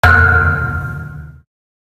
VSH-28-knuckle-thump-metal pipe-med-rattle
Metal foley performed with hands. Part of my ‘various hits’ pack - foley on concrete, metal pipes, and plastic surfaced objects in a 10 story stairwell. Recorded on iPhone. Added fades, EQ’s and compression for easy integration.
crack, fist, hand, hit, hits, human, kick, knuckle, metal, metallic, metal-pipe, metalpipe, percussion, pop, ring, ringing, slam, slap, smack, thump